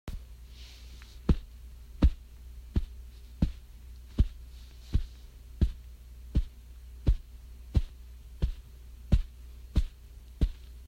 Footsteps, patting
Me patting my hand on a couch cushion. Thought it sounded kinda like foot steps
couch, cushion, feet, foot, footstep, footsteps, ground, pat, patting, pillow, plod, step, steps, thud, thump, velour, walk, walking